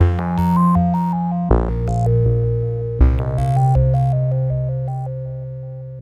Sine Melody #2
Simple melody made with VSTi. cheers :)
80bpm, delay, sinewave, soft, synth, synthesizer